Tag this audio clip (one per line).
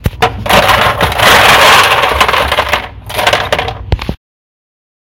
Cold
cracking
frozen
Ice